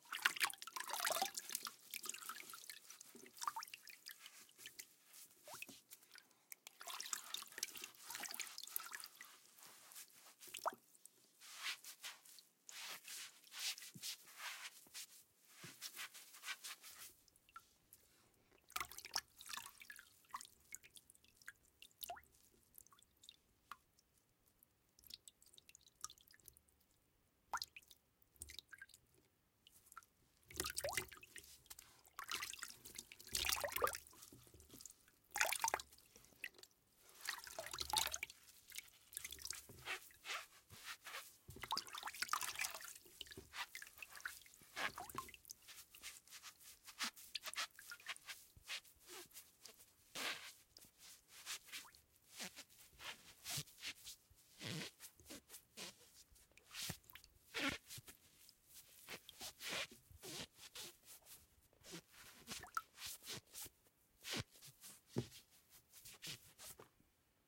Washing and scrubbing with a wet sponge. Recorded with Schoeps CMIT-5U shotgun mic.